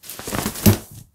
Umbrella Open Sound
A recording of me opening an umbrella.
Recorded with Sony HDR PJ260V then edited using Audacity
field-recording, open, opening, parasol, sound, umbrella